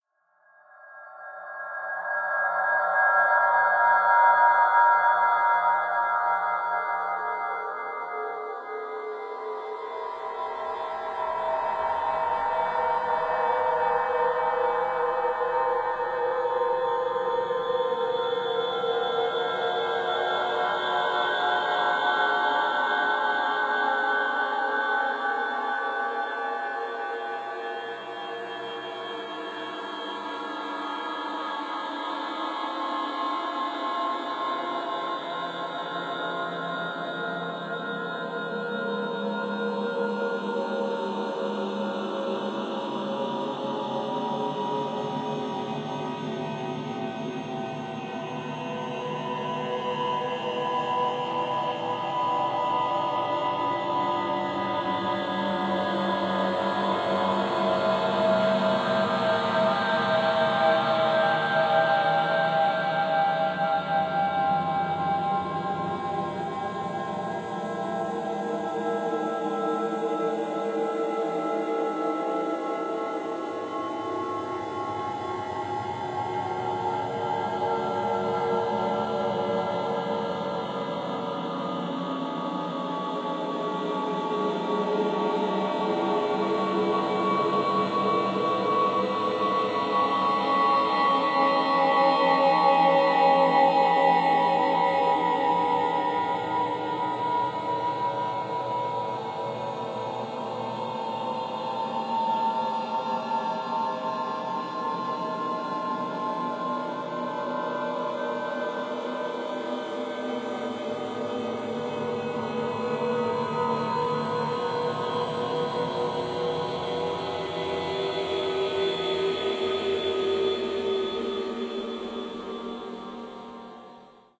Long, anguishing sample, generated via computer synthesis. Perfect for sci-fi effects.